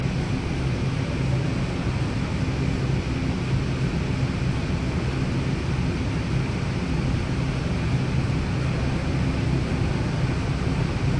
This sound is caused by machines from audiovisual control room in Tallers building. Te sound was recorded outside of room leaving the H2 recorder near to the door.
Noisy sound like brown noise with some resonances from machine is perceived. RMS level of sound is mid high.